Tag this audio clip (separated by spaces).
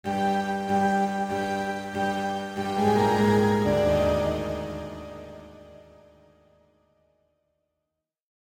dark synth night